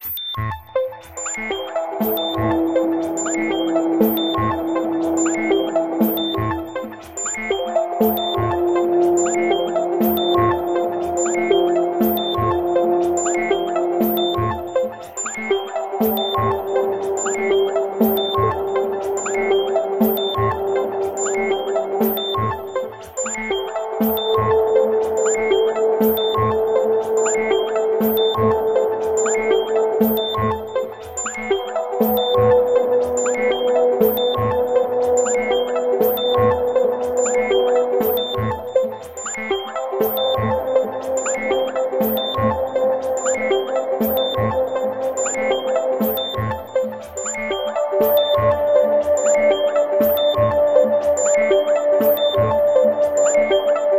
loop electro base
base, electro, house, loop, techno